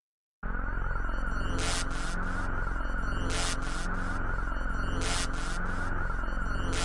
140 sound fx 3
140 bpm dubstep sound fx